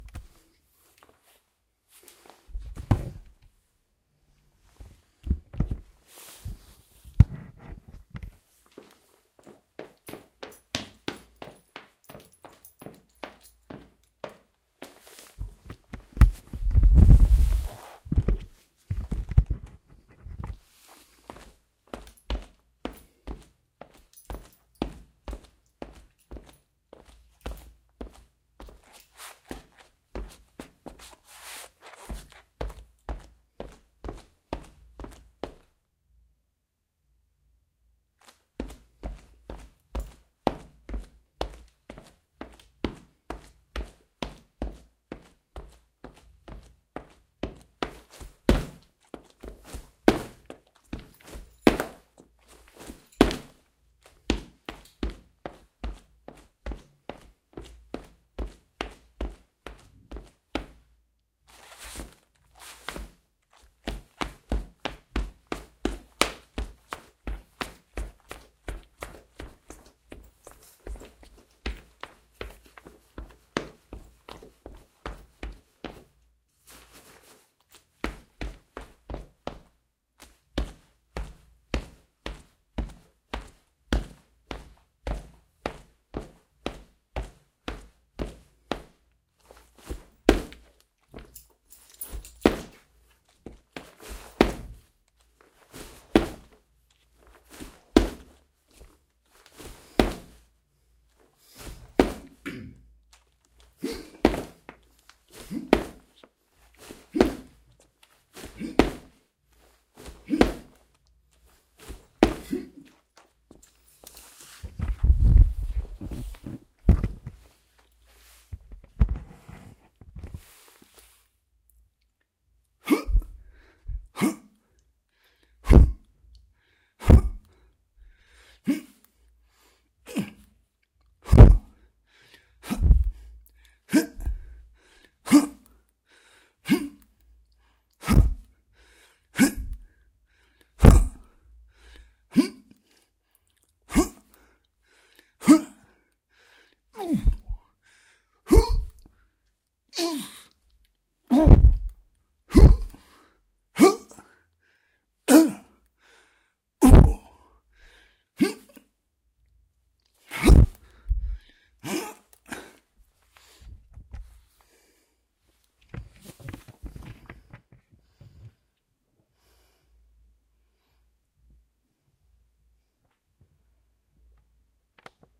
Footsteps Office
Footsteps, Interior